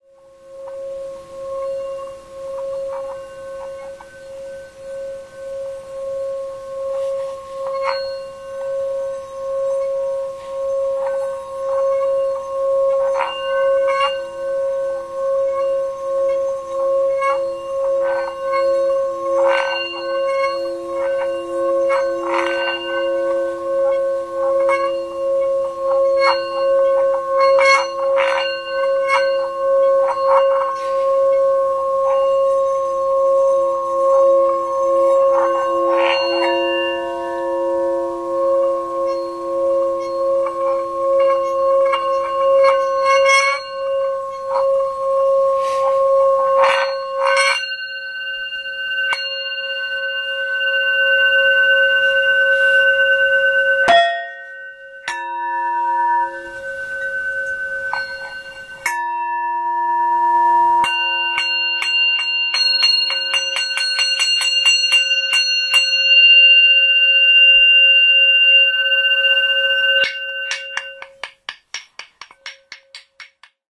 tibetan bowl6 251210
25.12.2010: about 14.00. my family home. the first day of Christmas. Jelenia Gora (Low Silesia region in south-west Poland).the tibetan bowl sound.
domestic-sounds, field-recording, instrument, tibetan-bowl, vibrate, vibration